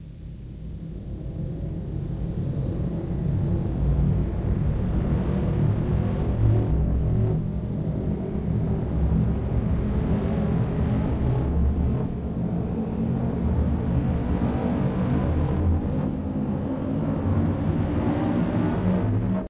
powering up
aliens, computer, engine, laser, motor, noise, ship, spaceship, weird